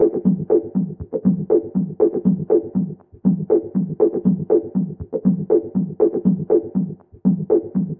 cw-120bpm-e-ResoSeq a
This is a lopass filtered noise sequence from the waldorf microwave 1. one of the last sold units with analog filters.
recording of the waldorf microwave is done with a motu audio interface and ableton live sequencer software.
Waldorf-Microwave, Synth, Resonance, Noise